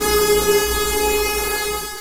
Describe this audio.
Square wave rising from A to slightly sharp with some modulation thrown in rendered in Cooldedit 96. Processed with various transforms including, distortions, delays, reverbs, reverses, flangers, envelope filters, etc.
digital, synth, synthetic, wave